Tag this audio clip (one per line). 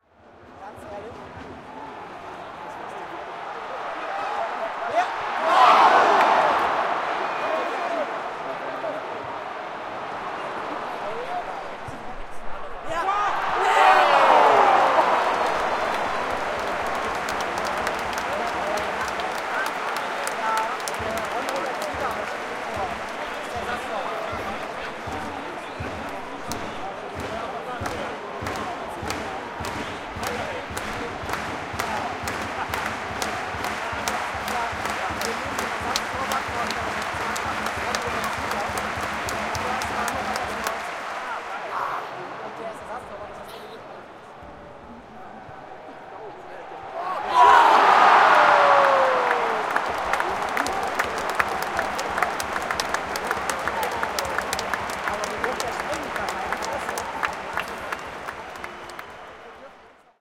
chanting
cheers
fans
football
game
shouting
soccer
sport